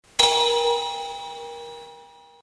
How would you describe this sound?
Microphone inside of 5 Gallon Glass Water Bottle.
Bottle struck from the out side.
rubber, glass, handle, water, bottle